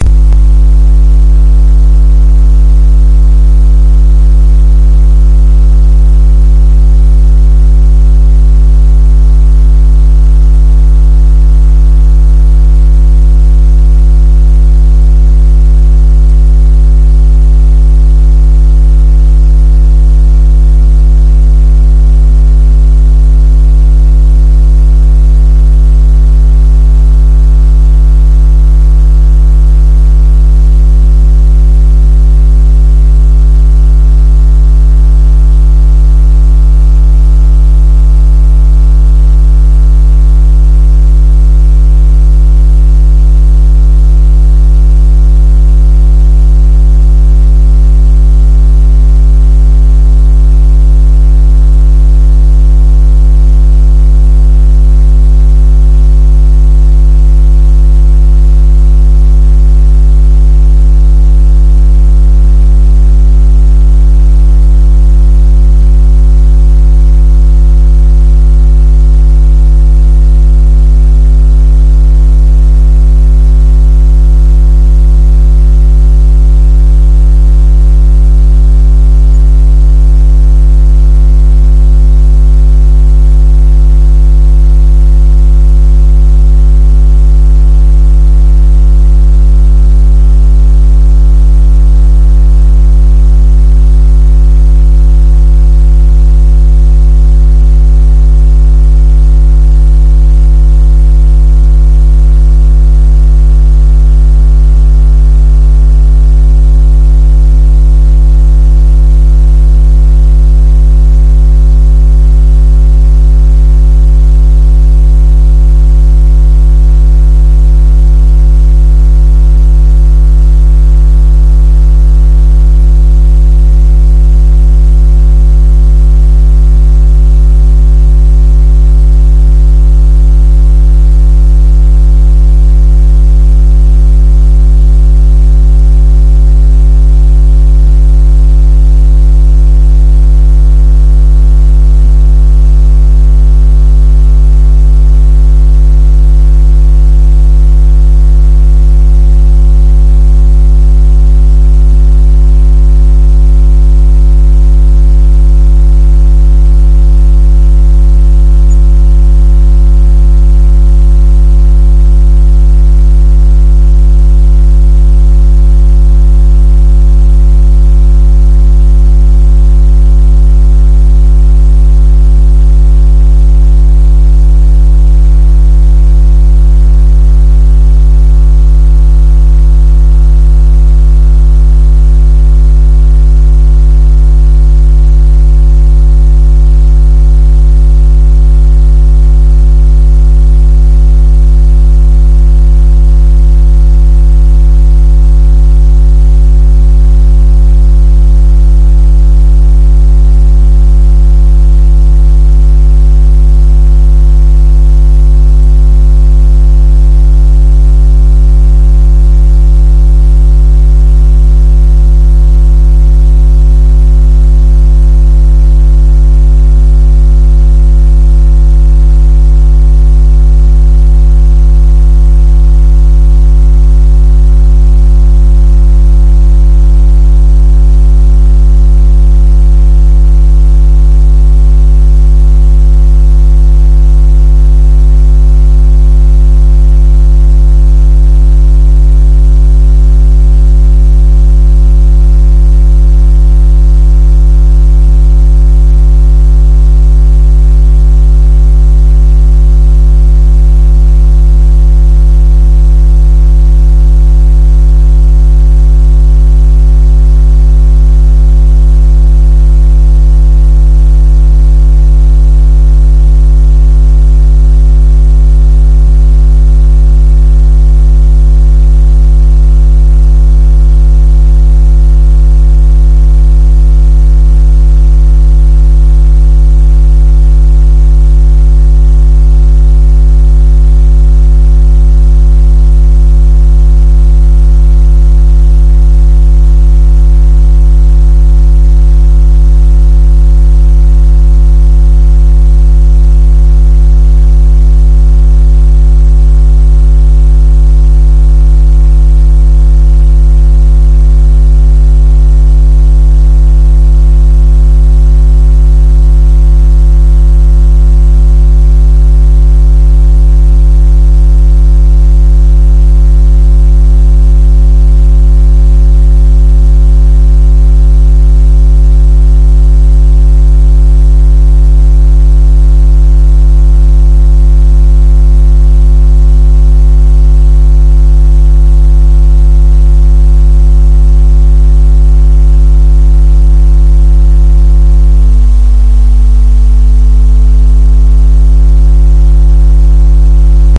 ECU-(A-XX)50+
ATV ECU Electric Field Fraser Lens Thermopile Atmospheric Engine Bio Flexfuel